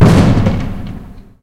Short Explosion
This is the sound of a loud firework slightly amplified.